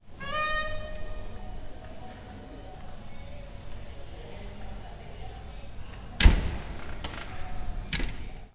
Open and close a creaky door.